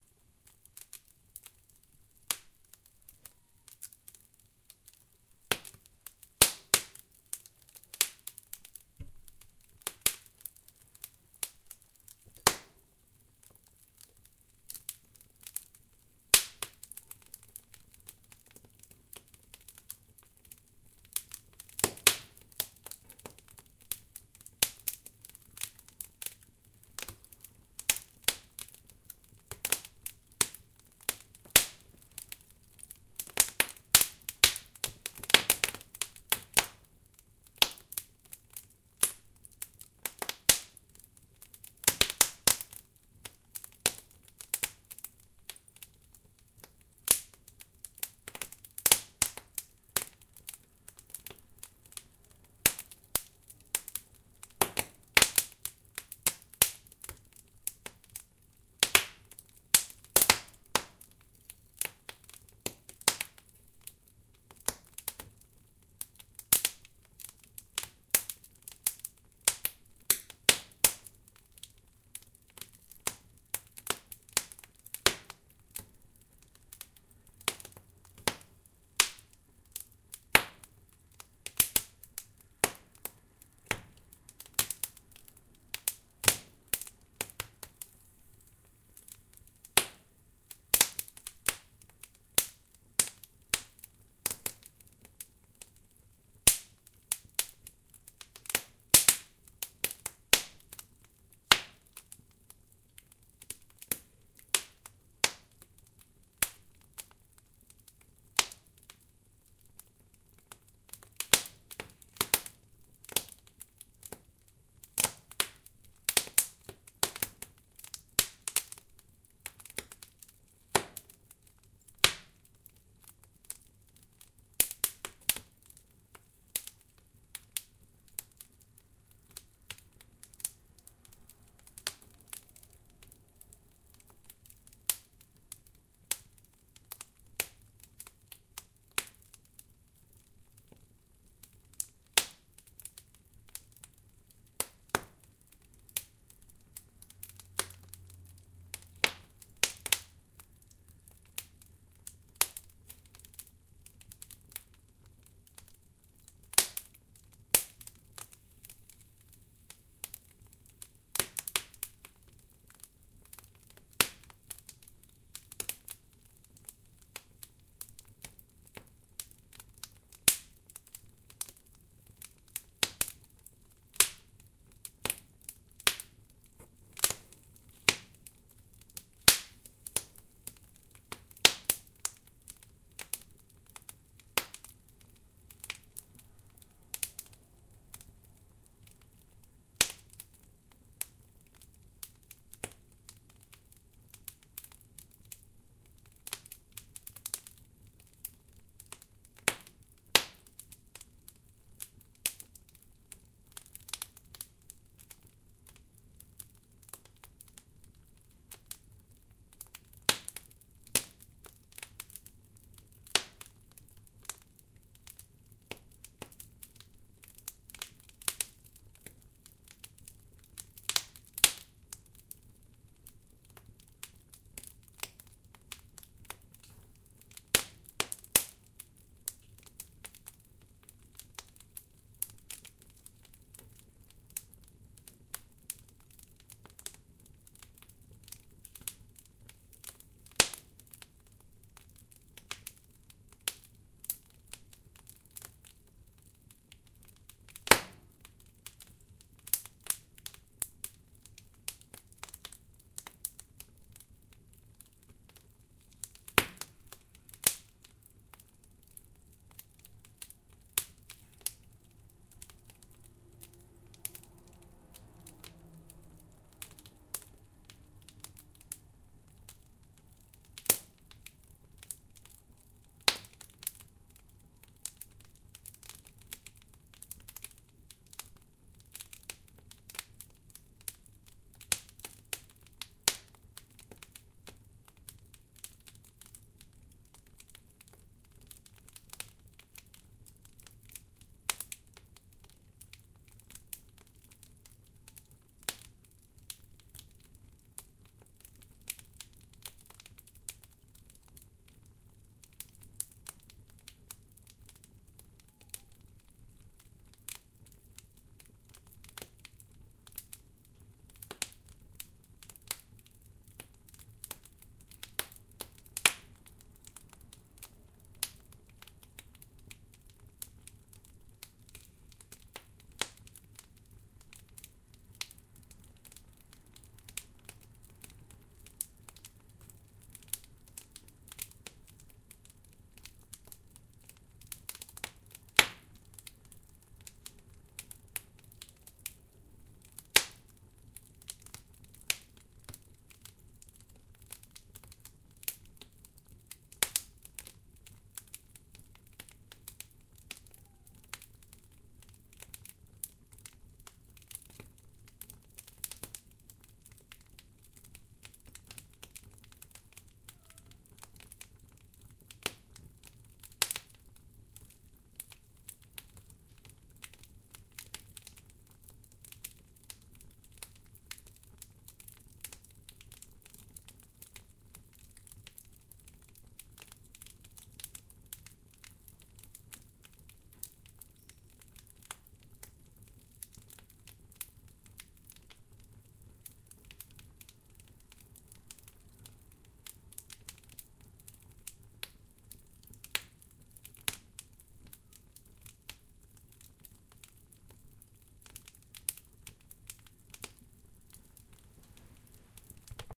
Crackeling Fireplace
This is a recording of my stone fireplace burning with a Zoom H4N Pro. I was burning wet, North Carolina Lob-lolly Pine so that I would end up with a nice, consistent popping sound. Great for any fireplace scene when used at various volume levels.
fireplace, fire-place, flames, woodstove, ambience, wood-stove, fire, wood-fire, atmo, soundscape, field-recording, wood, background-sound, sparks, pine, burning, roaring, atmosphere, background, ambient, atmos, crackling, ambiance, crackle, general-noise, flame